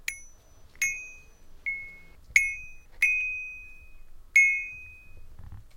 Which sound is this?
a wind chime
ting, chime, jingle-bell, bells, chimes, bell, wind-chimes, ring, clink, jingle, ding